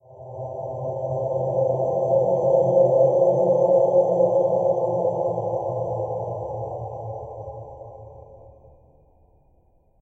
The queer spacecraft soars through deep space, its engines rumbling. If this describes your sound needs you've found the perfect sound! Could also pass as a ambient effect. Made by paulstreching my voice in Audacity. I always appreciate seeing what you make with my stuff, so be sure do drop me a link! Make sure to comment or rate if you found this sound helpful!